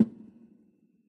This comes from a drum synth function on an old mysterious electric organ. It also features the analog reverb enabled.
analog, cabinet, synth, reverb, speaker, drums